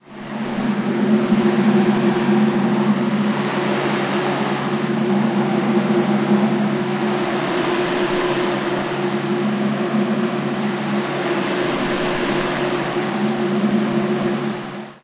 Air Conditioner, Rotating Fan, A
Audio of a large rotating fan in use outside of the Jacksonville Zoo. You can audibly hear the machine swirling around as it faces away and at the recorder. Slight removal of bass frequencies.
An example of how you might credit is by putting this in the description/credits:
The sound was recorded using a "H1 Zoom recorder" on 22nd August 2017.
ac, air, conditioner, conditioning, fan, rotating